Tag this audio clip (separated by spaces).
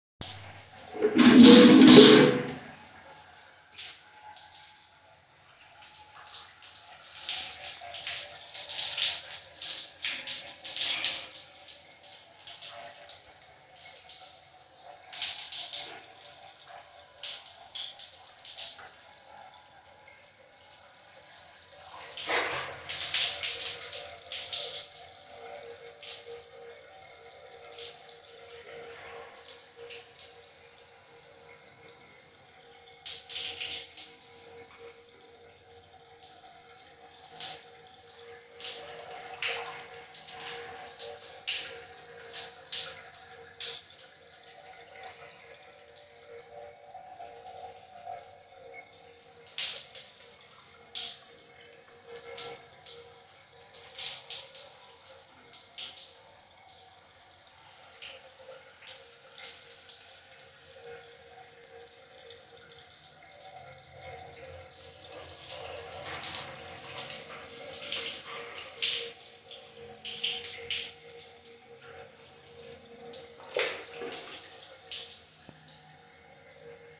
having bathroom